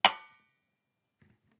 Wooden-Barrel One-Hit 1
Striking a wooden barrel with a thick wooden drum stick I have for large percussion.
Minimal EQ to remove useless frequencies below 80 hz, no boosting more than 2db, so very non destructive.
No compression added
No permissions needed but I'd love to hear what you used it in!
Barrel, Drum, Drums, Field-Recording, Hit, Hollow, Percussion, Stick, Transient, Wood, Wooden, Wooden-Stick